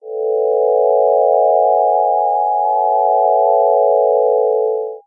08 Metallic Additive Shimmer
A metallic shimmer produced by additive synthesis.
additive-synthesis, maxmsp, audio-art, itp-2007, metallic